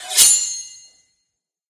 Draw sword#1
Big knife and sharpening steel. Manipulated and pitch to sound like a drawn sword.
Zoom H1.
Swords, Sword, Battle, Draw, Medieval